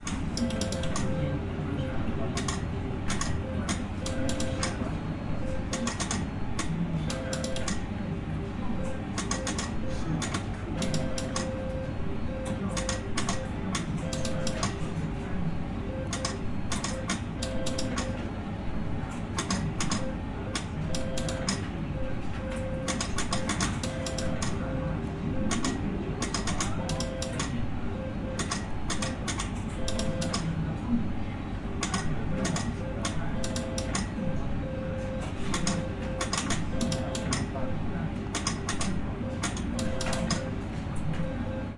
slot machine casino
machine, slot, casino